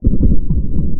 The sample is an industrial loop that can be used at 120 BPM.